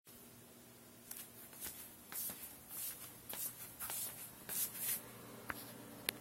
The steps sound of a person walking normally on the flat floor with a sandal.